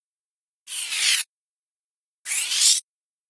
Max Min GUI
Minimize and maximize user interface sounds made with the Granular Scatter Processor and Sliding Time Scale / Pitch Shift.
Edited with Audacity.
Plaintext:
HTML: